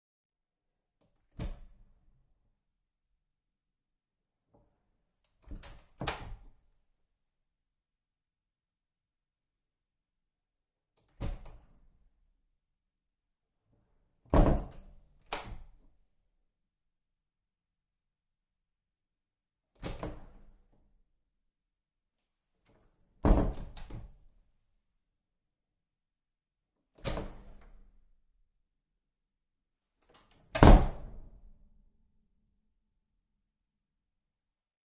front door multiple open close
front door opened & closed multiple times
km201(omni)> ULN-2